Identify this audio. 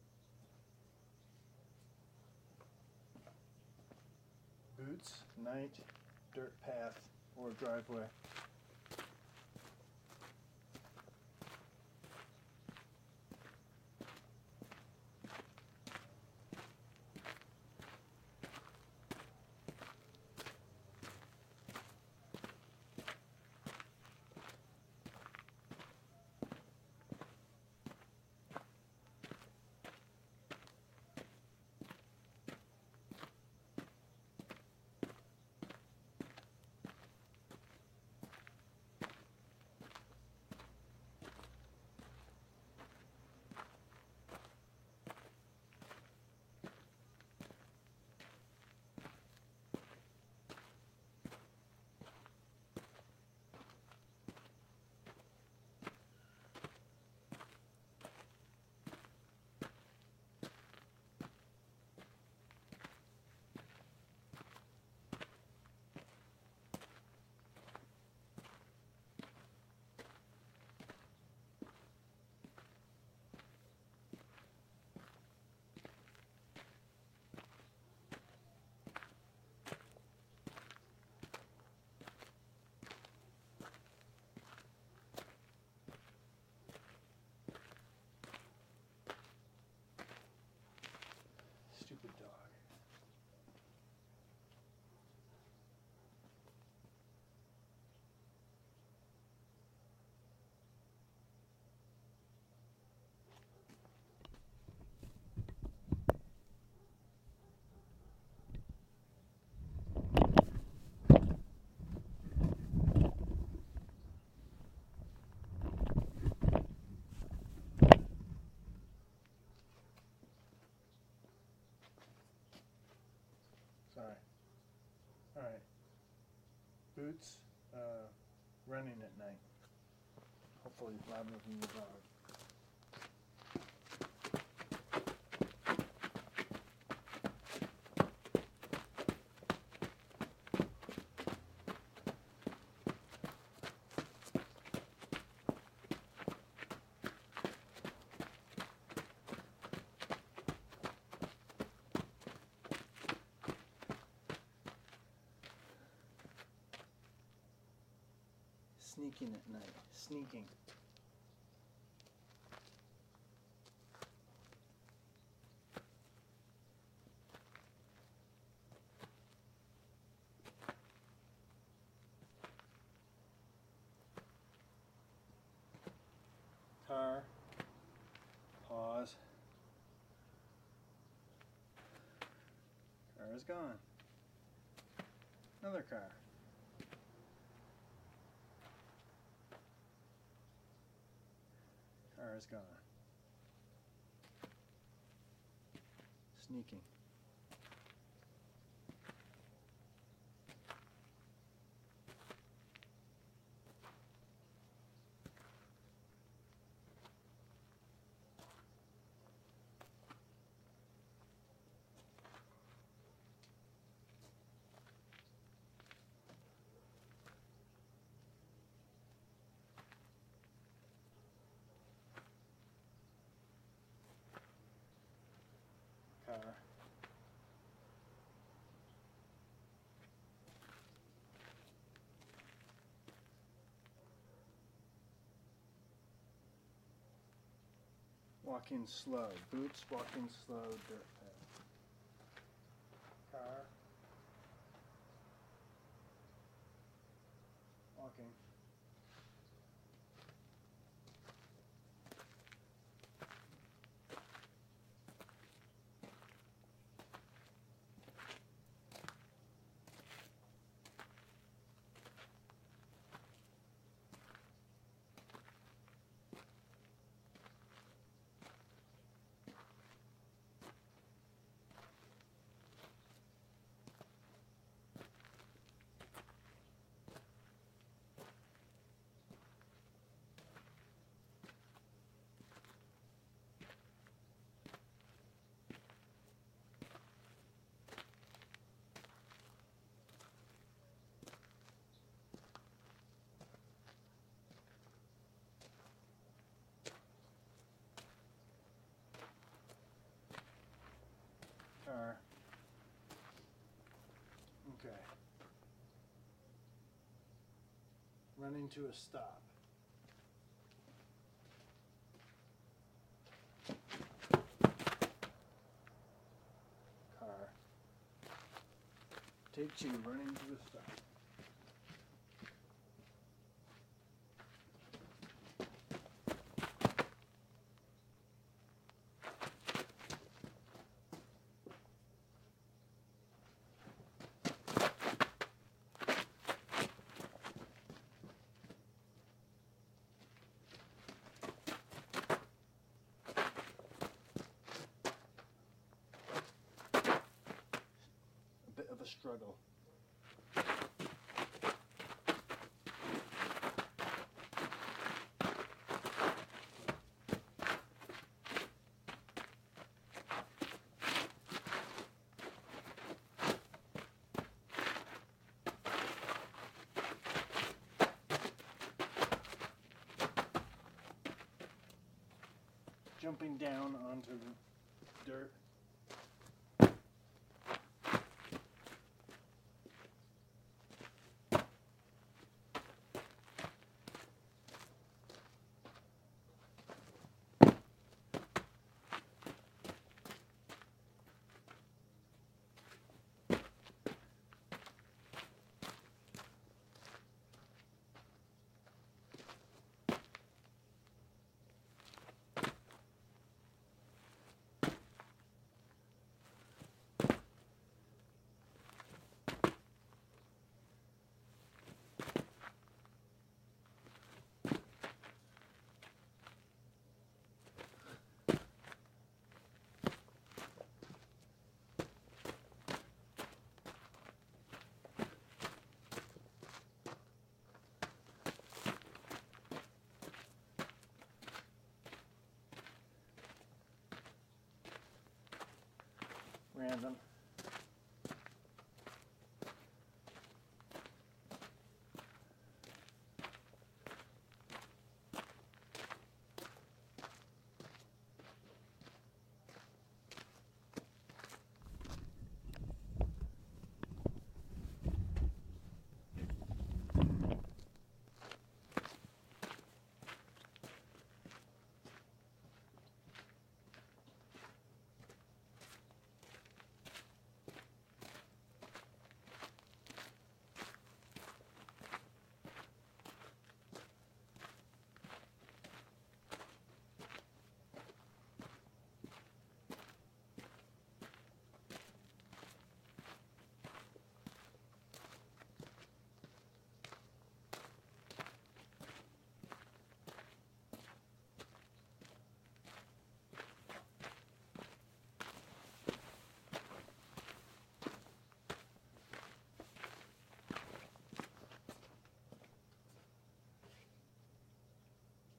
Better Boots Dirt Path:Driveway
8 minutes of Hard Sole Boots walking on Hard Packed dirt. Walking and Running at different speeds, Side to side and To and From The Mic. Some minor background noises
Boot-footsteps, Boots-Running, Boots-walking, Dirt-Road, Foley, Footsteps, Steps, Walk, Walking